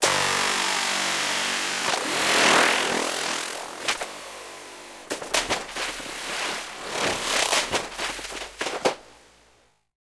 twistedwooshes-plastic1
Abstract wooshes made from sound of stepping onto plastic bottle. Heavily processed in HourGlass.
abstract, crazy, FX, glitch, mind-bend, processed, whoosh